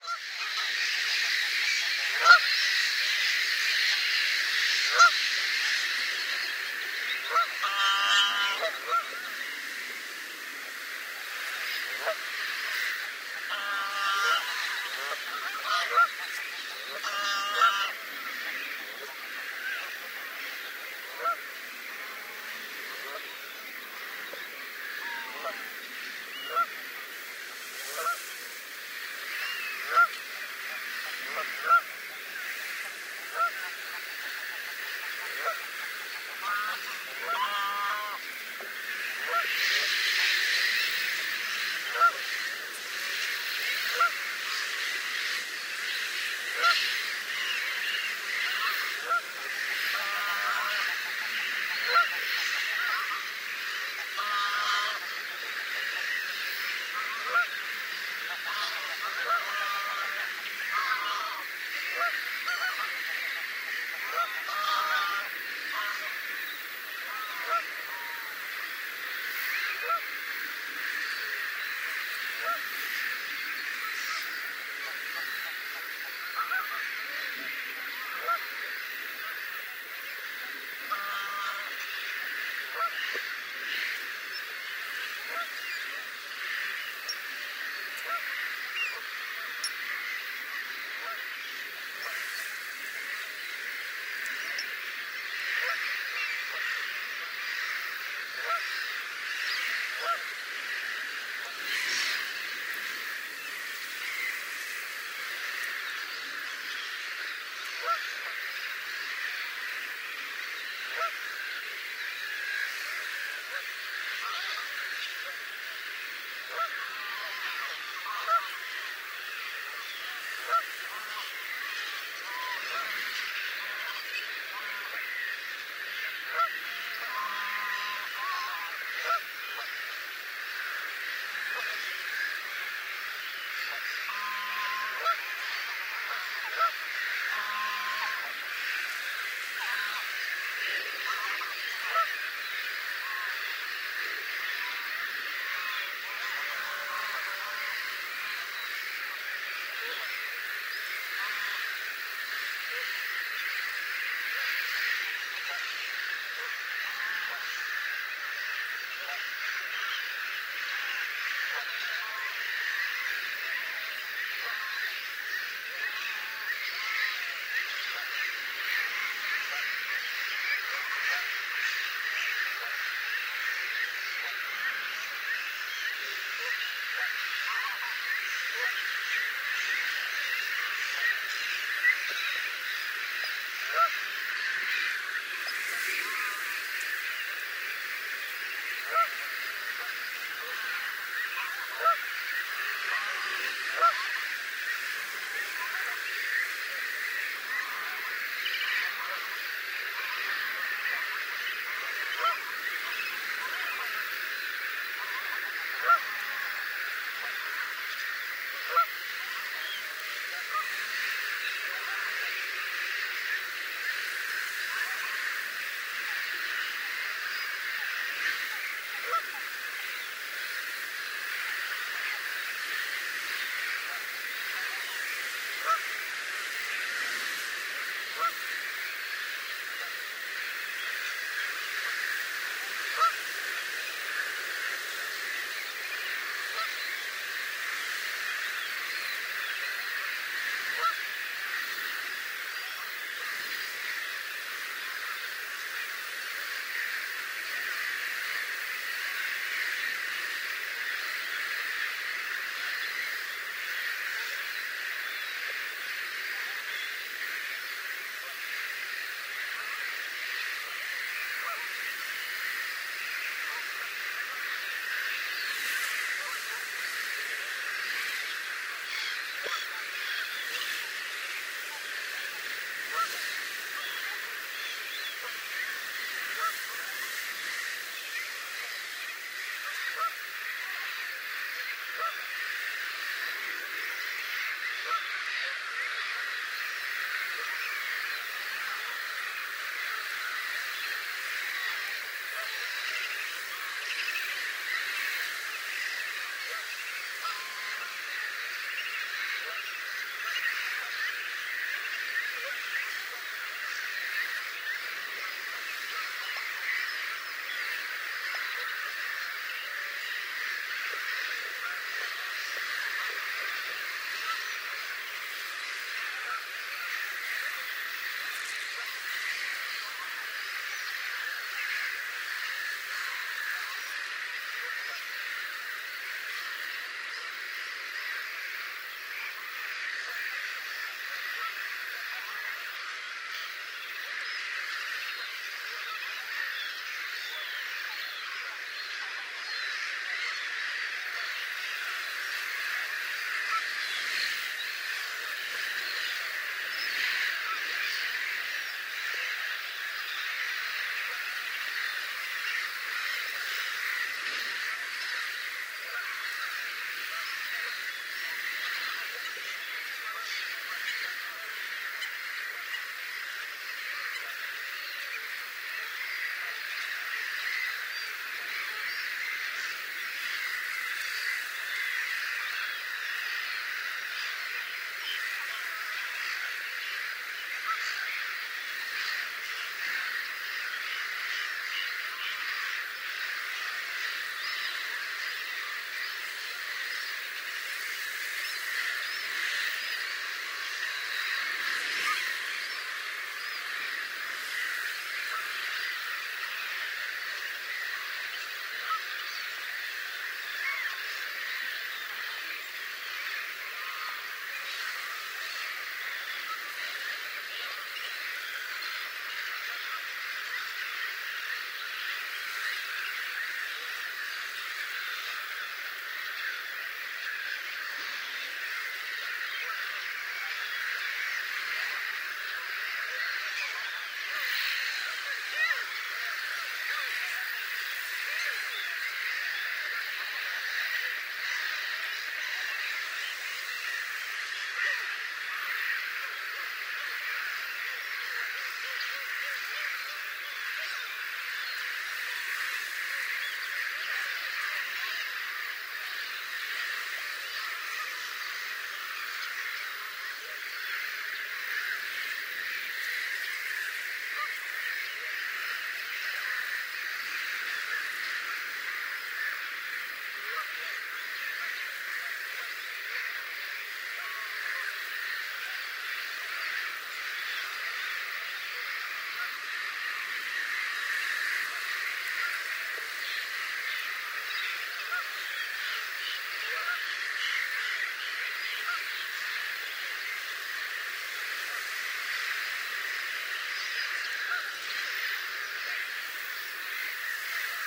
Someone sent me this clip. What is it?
Bowers Marsh SoundScape
Soundscape recorded at Bowers Marsh, Essex, UK in the early afternoon of 24/06/2022. Black-headed gulls can be heard throughout. Other birds include greylag goose, Canada goose and coot, plus some others.
This was a very windy day.
Recorded with a parabolic mic attached to a Zoom F6.
ambient, birds, nature, RSPB